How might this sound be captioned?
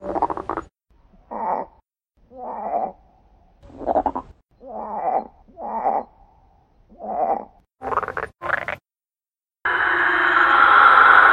Frog Stress
These are all real frogs... the only one I changed is the stressed-out one at the end. Recorded in my back yard.